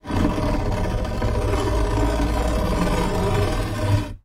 S023 Metal Rail Scrape Mono
Rally car scraping along a metal rail barrier
Scrape, Collision, Metal